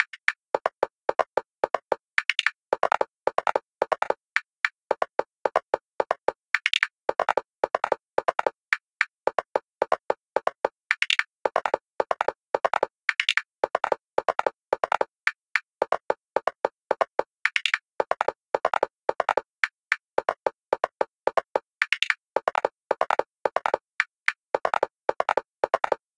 Random Beat Loop 110 BPM
I created these Drum Beat/loops using Audacity.
dub, beat, step, techno, loop, jazz, hip, hydrogen, bpm, Drum, house, trap, club, music, rock, hop, rhythm, rap, edm